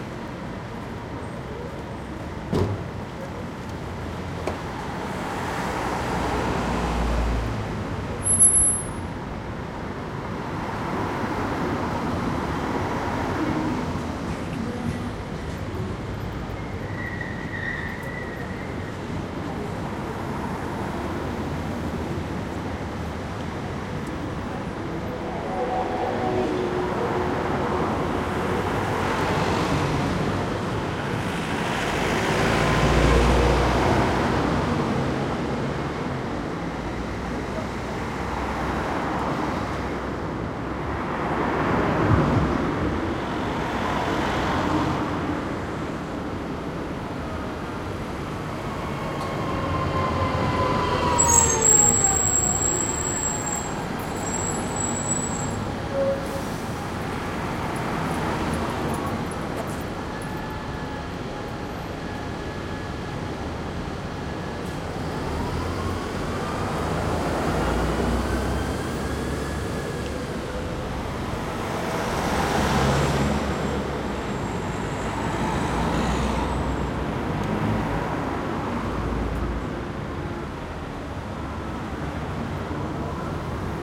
140802 Greenwich StreetNoon R
4ch surround recording made at a bus stop in Greenwich/England at noontime on a weekday. The recorder's rear mics, featured in this file, are facing away from the street into the sidewalk at a right angle at a height of approx. 60 cm. Traffic is passing in both directions, about 1 min. into the recording, a bus stops and continues onward.
Recording was conducted with a Zoom H2.
These are the REAR channels of a 4ch surround recording, mics set to 120° dispersion.